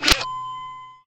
caja rrev
Mechanical sound of a Kodak printer.
robotic, kodak, printer, robot, hydraulic, machine, mechanical